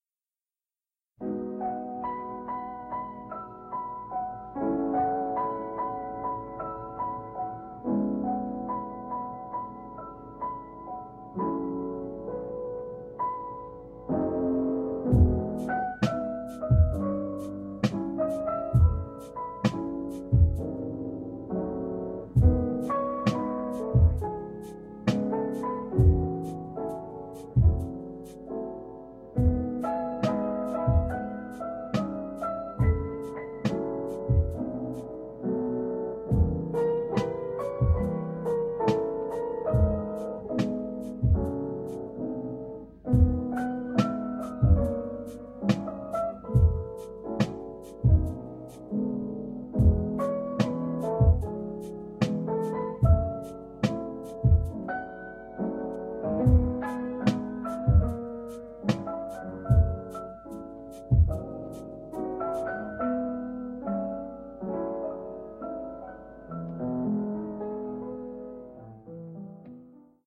I just need to close my eyes (without voice)
chill, chill-hop, chillhop, fi, hop, jazz, jazz-hop, jazzhop, lo, lo-fi, lofi